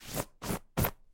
Cloth, Bedding, brush, sweep, back and forth-007
The sound of a hand brushing back and forth on a sheet or blanket
bedding, brush, cloth, sweep